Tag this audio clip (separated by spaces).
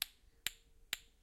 Essen mysound object